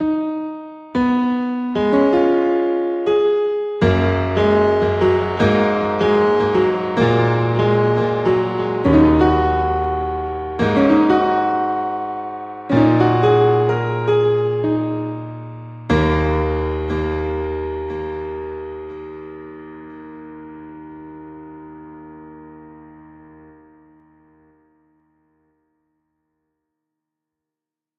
Basement Pianist (Ambient Piano Snippet)
This is a short piano snippet (D-sharp minor) that I've recorded while playing around on my keyboard. It was recorded (MIDI) and edited with Ableton Live and Sound Forge Pro. I used a tube effect to warm it up and reverb and delay for atmosphere.
ambient, grand, improvised, keyboard, keys, music, piano, reverb, snippet